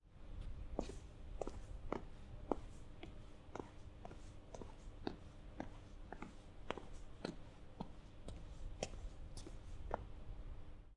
Walking on a subway platform.
station, footsteps, Czech, CZ, subway-platform, Panska, Pansk